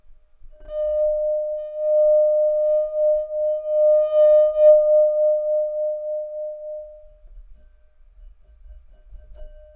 Tono Corto 2
bohemia glass glasses wine flute violin jangle tinkle clank cling clang clink chink ring